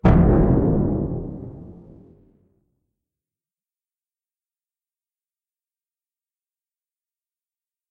Tribute-Cannon
I wanted to make a sound effect for a game I'm developing, and so I recorded a 100-mm artillery cannon at Camp Williams (Utah National Guard), then modified the sounds using Audacity.
The recording was done on an Olympus digital recorder in the mid-2000s (first decade).
It sounded a little to me like the tribute cannon from Hunger Games (the sound effect that signals the deaths of the tributes), thus the name.
—VJ
military, hunger-games